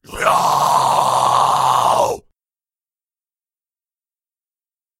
Toni-HighGrowl2
High Growl recorded by Toni